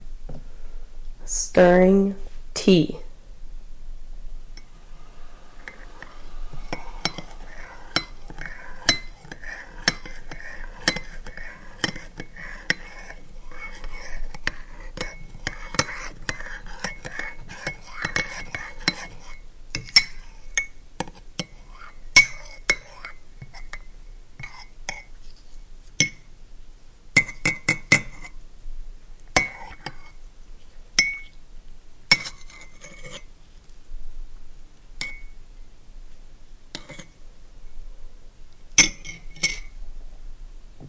stirring tea
tea, stir, stirring, cup, honey, spoon